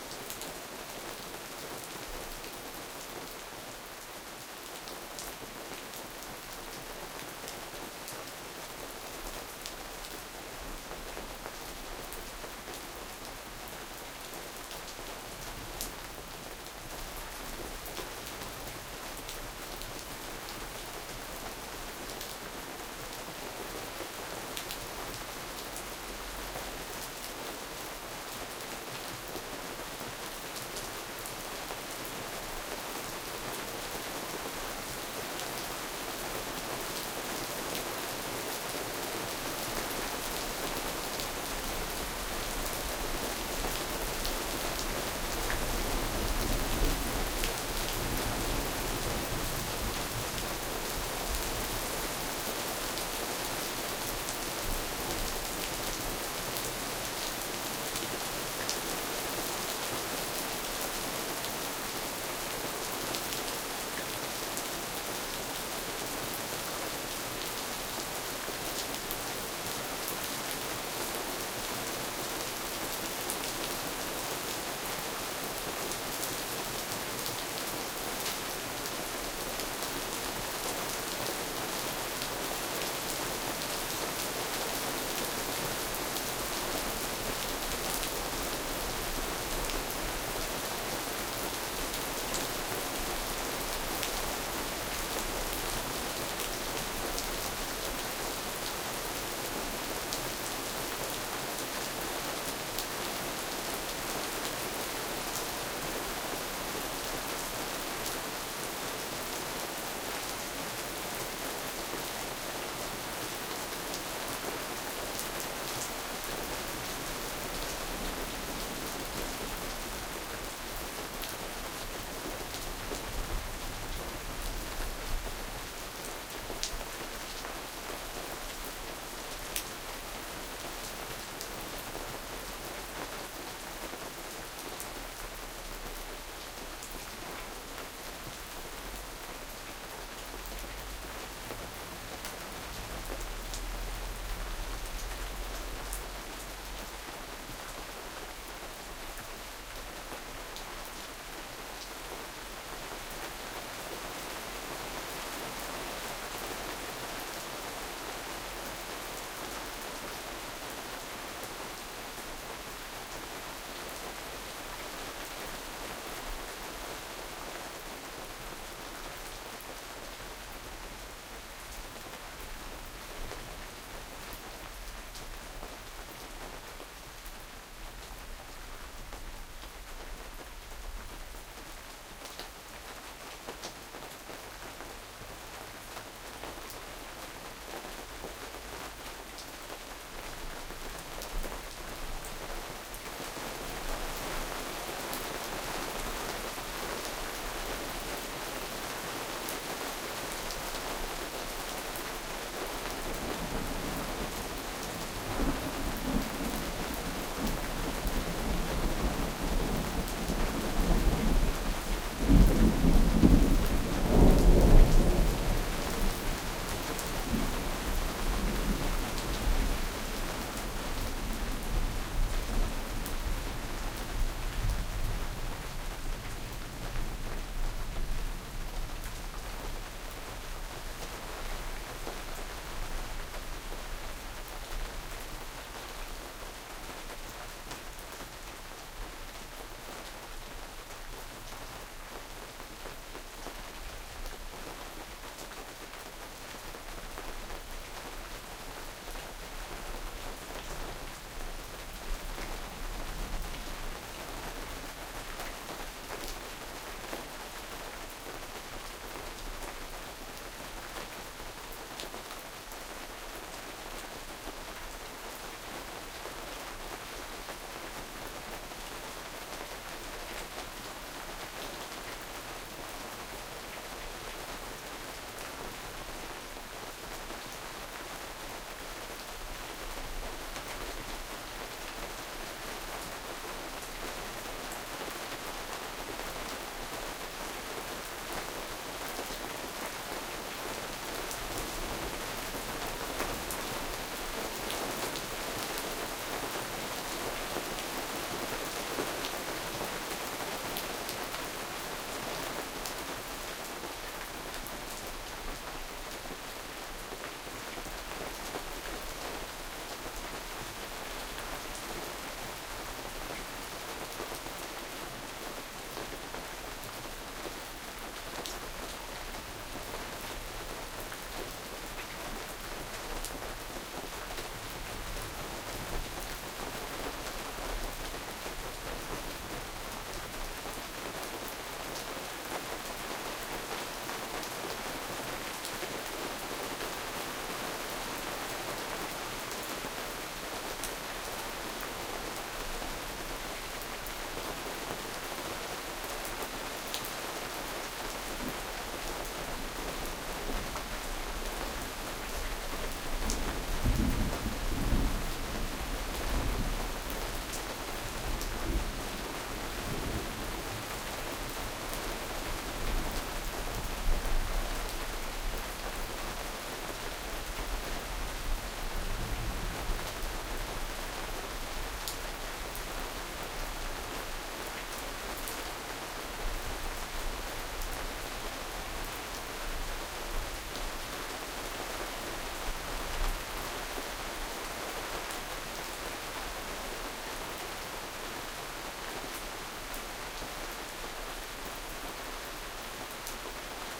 Rain thunder garden
Summer storm, with rain, hail and thunder. Recorded with a Soundfield ST250 a 90º Fig 8 (Blumlein) microphone and Sound Devices 722 recorder
blumlein, ST250, thunder, summer, rain, garden, Soundfield, ambisonic, august, hail